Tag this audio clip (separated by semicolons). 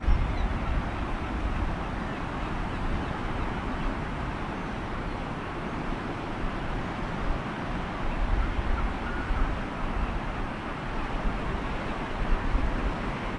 gulls; surf; winter; sea; seabirds; shore; birds